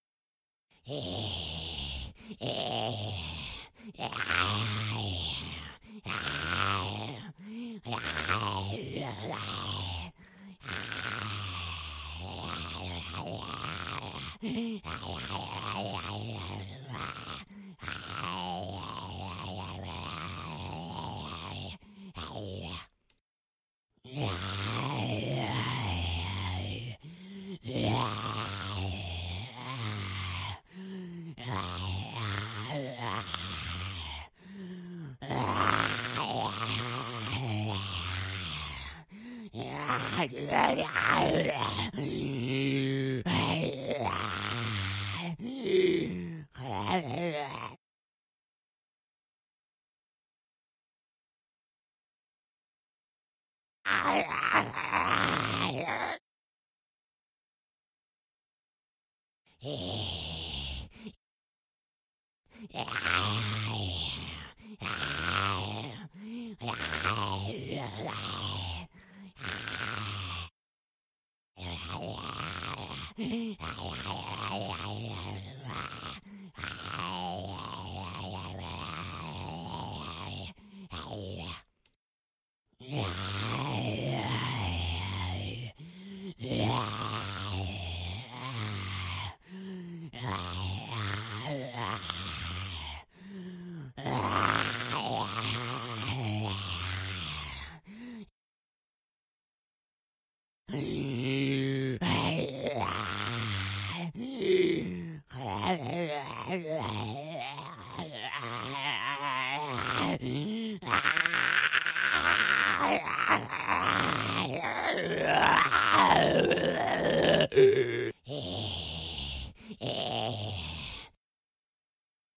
Solo Zombie 4
Single groaning zombie. Syncs at 08.24.14.
dead-season, groan, horror, monster, solo, undead, voice, zombie